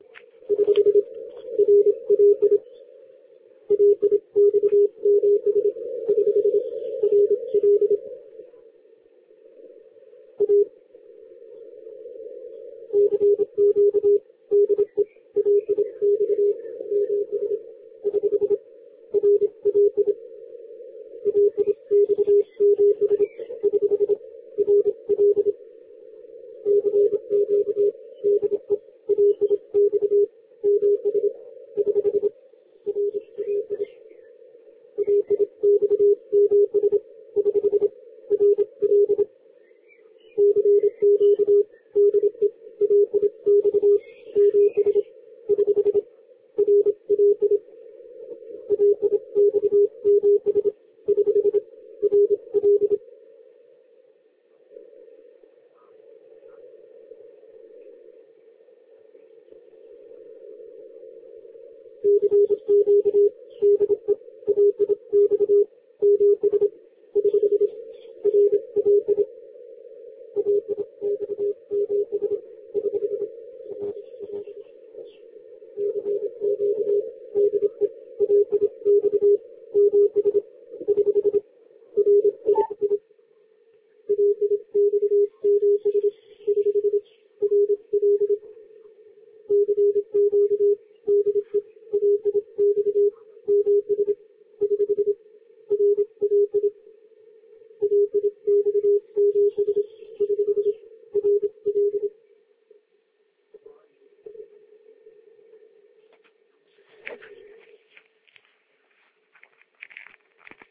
LX75RL-20120526-1245UTC-10105.5KHZ
This good boy is not good player. I think he used bad keyed text recorded in keying memory. I heard him more times and always with the same punk keying.
But operator cannot be proud of his keying.
Later I found that LX7RL is station of LX amateur radio society anniversary celebration.
cw, Radio-Luxembourg, Strange-morse